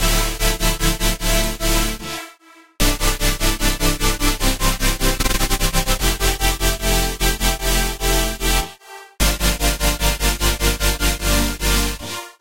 150bpm Saw Chords - Future Bass
Future bass supersaws, created with Xfer Serum in Reaper. Decided not to use this chord progression for my track, but perhaps it can be of use to someone!
adsr, bass, chord, chords, electro, electronic, flume, future, fx, loop, music, noise, processed, saw, sine, synth, techno, triangle, waveracer, waves